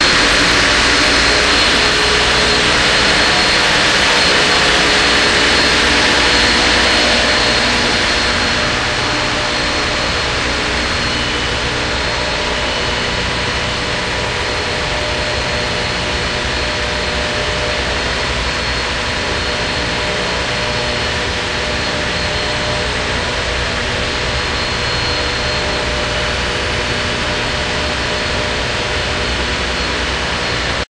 capemay engineroom
Engine room on the Cape May-Lewes Ferry heading south recorded with DS-40 and edited in Wavosaur.
bay, boat, cape-may-lewes-ferry, delaware, field-recording, new-jersey, ocean